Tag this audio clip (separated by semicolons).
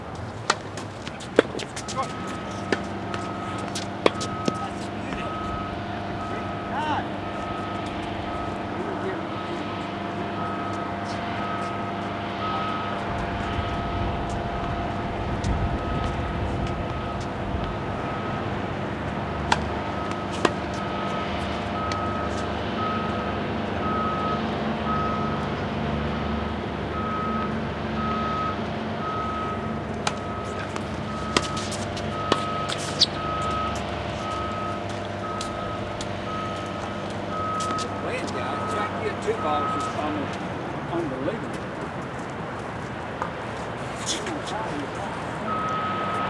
people; ambience; tennis